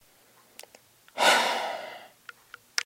A person sighing deeply.